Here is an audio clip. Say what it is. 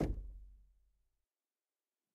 Door Knock - 28
Knocking, tapping, and hitting closed wooden door. Recorded on Zoom ZH1, denoised with iZotope RX.
bang, closed, door, hit, knock, percussion, percussive, tap, wood, wooden